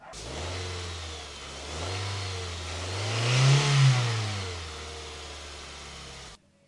Car starting engine